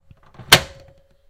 microwave close
short sound, close a microwave